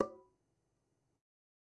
Metal Timbale closed 016
god, home, closed, real, trash, conga, record